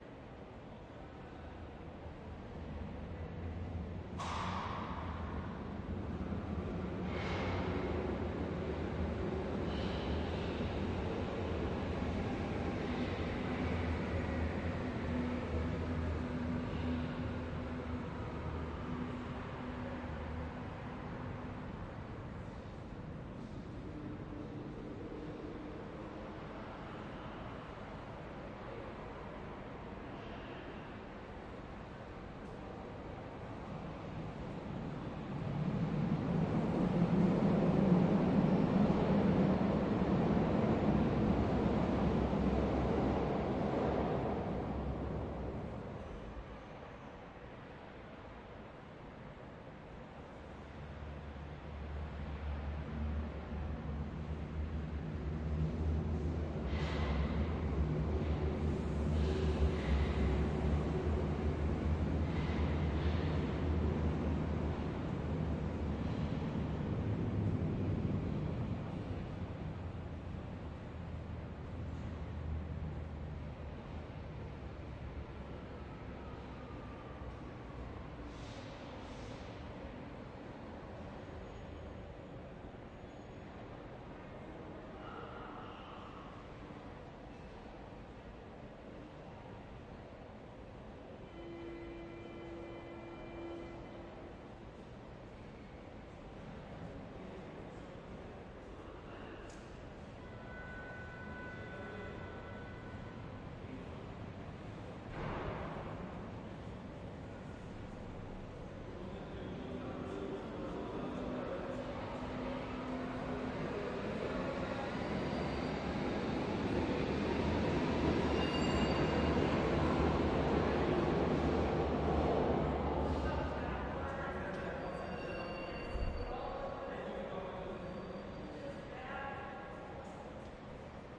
BG SaSc Distant Metro Station U8 Arrive Depart 3 Versions Underground U-Bahn Berlin Walla Far
Distant Metro Station U8 Arrive Depart 3 Versions Underground U-Bahn Berlin Walla Far